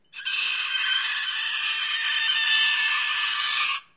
Fork scraping metal sound, like nails scraping sound